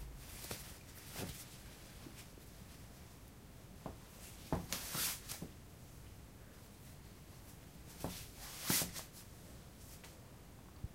Dressing-and-undressing-socks
dressing and undressing socks --> quiet sound